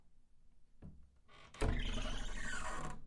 Closet door opening recorded near in bedroom.